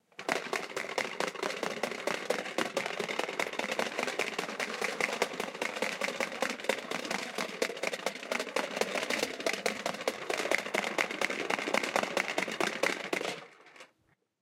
corn popper basic
My sons Fisher Price corn popper. I needed a sound to simulate a ball popping factory kind of effect. This is the basic track.
corn-popper, popcorn, popper, popping